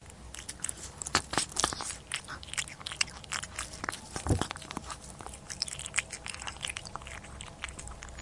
A sound effect of a cat eating its food
biscuit, cat, cats, chomp, crunch, eat, eating, food, kitten, munch, pet